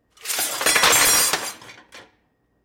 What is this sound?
Metal Clatter 2
various metal items
metallic, impact, metal, clatter